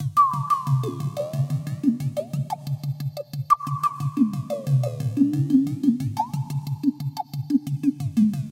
Muster Loop 5
120bpm. Created with Reason 7
drumloop, percussive, toms, roto-toms, drums